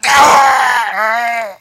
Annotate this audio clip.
Male Death 1
a male death sound